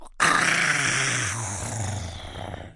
Monster growl 10
A monster/zombie sound, yay! I guess my neighbors are concerned about a zombie invasion now (I recorded my monster sounds in my closet).
Recorded with a RØDE NT-2A.
Apocalypse, Creature, Dead, Growl, Horror, Invasion, Monster, Monsters, Scary, Scream, Zombie